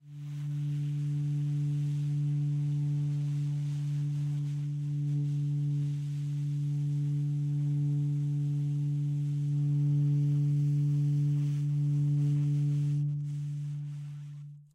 A long subtone concert D natural on the alto sax.